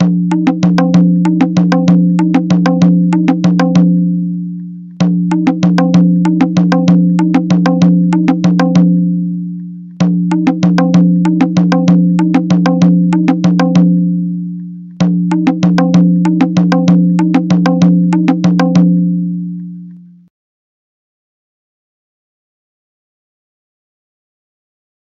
maadal-solution-jhyaaure
Maadal beats played at 96 bpm with the following sequence: dhing, silence, taang, taak, dhing, naa. 4 times repetitions in 32/16 time signature. It probably matches with Jhyaaure beats, by name.
maadal, Jhyaaure, beats